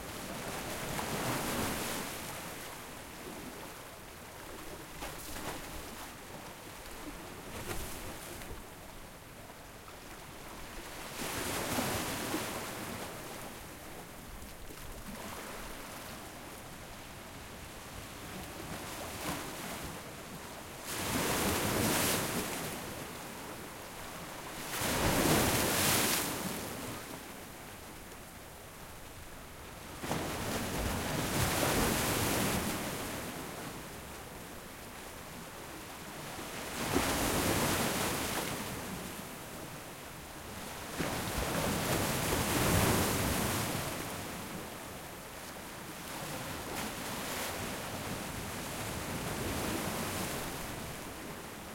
Crashing Waves into Rocks 2
Recorded in Destin Florida
Large waves crashing into large rocks. Also, some splash when the water falls down.
large, rocks, sea, splashes, water, powerful, coastal, splash, wave, tide, coast, seaside, shore, beach, field-recording, crashing, crash, dripping, ocean, surf, waves